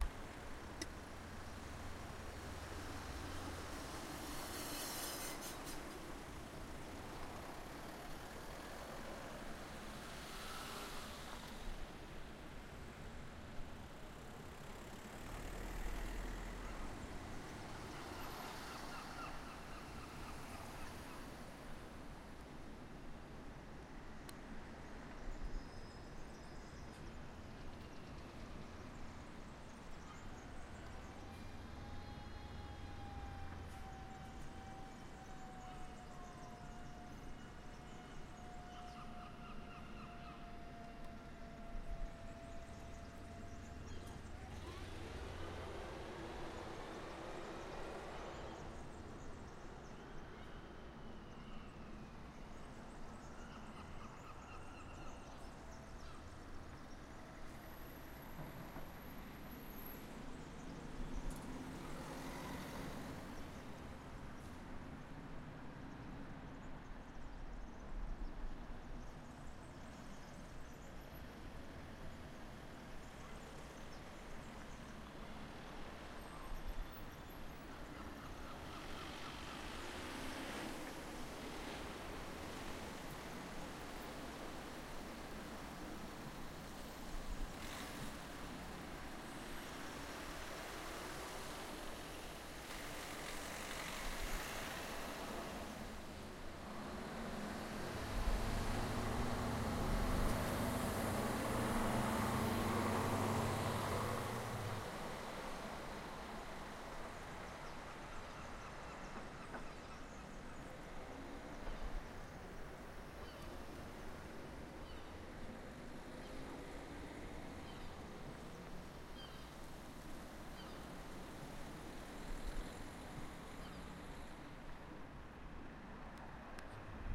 Field recording of a roundabout in Aguas Santas, Porto. Heavily high passed, due to strong winds I'm afraid... Recorded with a Zoom H1.
ambience, cars, city, field-recording, noise, roundabout, street, traffic